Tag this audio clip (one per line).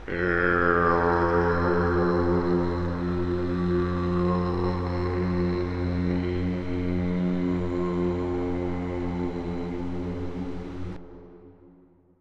voice
shaman
wooo
utulation